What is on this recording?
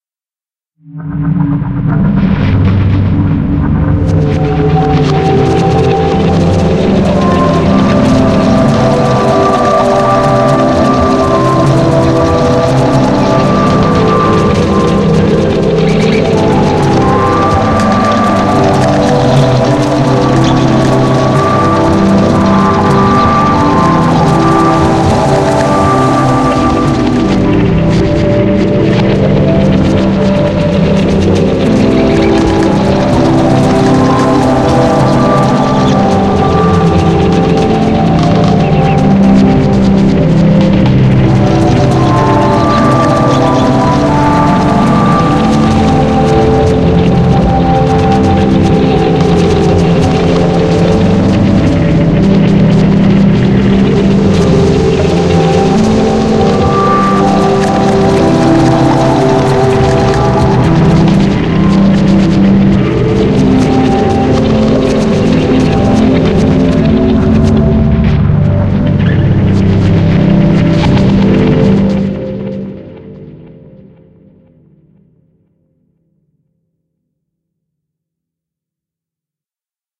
World of ants pad
A weird pad of the world of ants made with The Mangle Granular synthesis
ants, mangle, pad